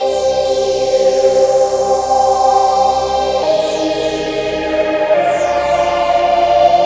Pad sequence with high distortion
trance, 140-bpm, dance, synth, progression, bass, sequence, pad, strings, phase, melody, beat, loop, techno, electronic, distorted, flange